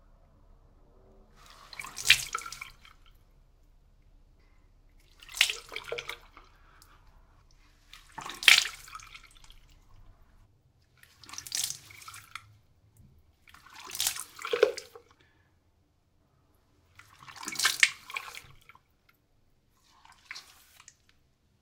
A series of lightly splashing water in my face. Starts with a small amount of water cupped in my hand and then applying it on my face over a sink. This was a single long clip with me refilling my hands but I chopped all that out leaving only the splashes for the most part